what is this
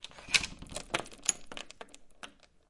door chain remove 3
Removing a security chain from a wooden door.